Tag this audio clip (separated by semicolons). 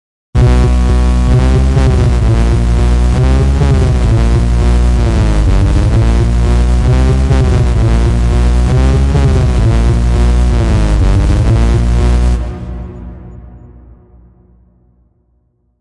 Dog; EL; electric; horse; King; pizza; sound